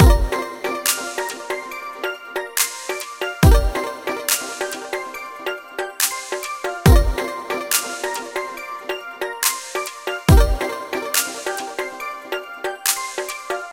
cgi tropical paradise loop
white sneakers hit the dance floor at a resort somewhere in Florida
dolphins, loop, music, paradise, seapunk, tropical